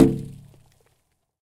Snowball hits a window, sound from outside. Recorded with a Tascam DR-40